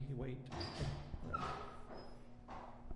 live Orchestral gong